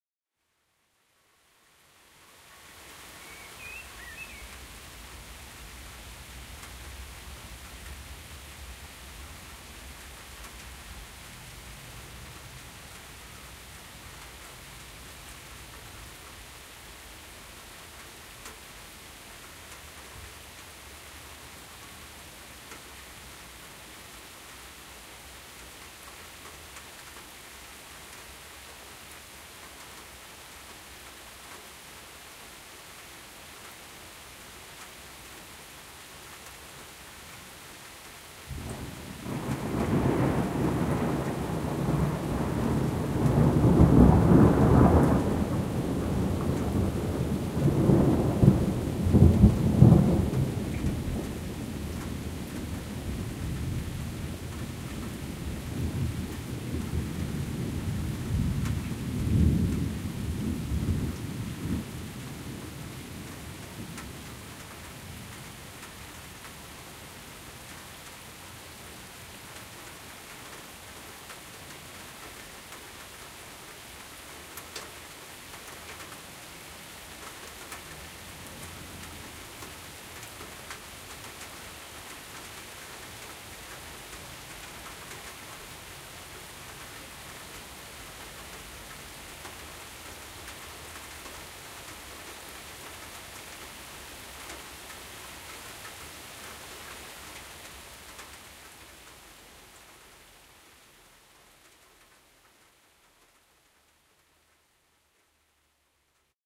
distant thunder & light rain 2
Summer afternoon with light rain and distant rumbling thunder. Some birds chirping.
Germany near Frankfurt @ the open window of my room - with view to a garden area with many trees.
The rain can be heard on the close-by metal rain gutter.
some low background noise of cars.
Recorded with an Zoom H4n mics on 90°